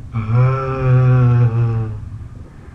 Scream recorded with Dell laptop
666moviescream, creature, goof, monster